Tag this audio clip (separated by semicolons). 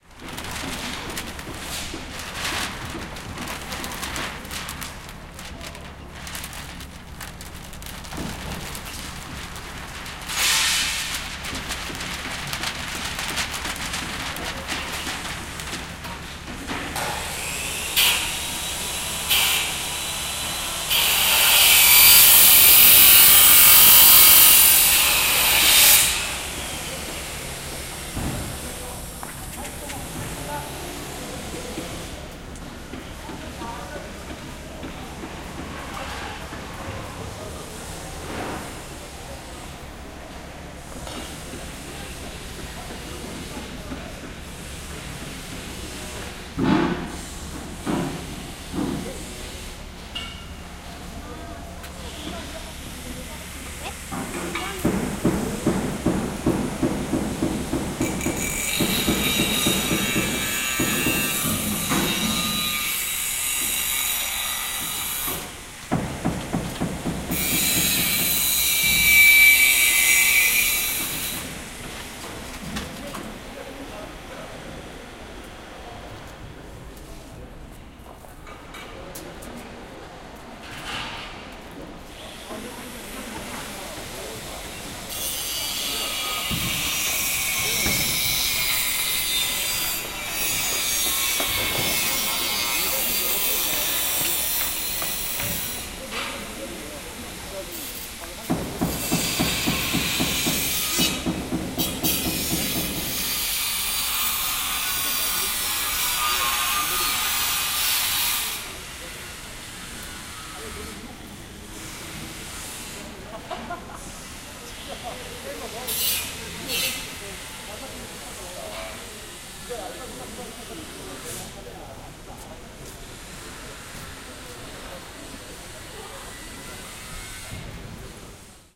field-recording; construction; seoul; angle-grinder; machine; korea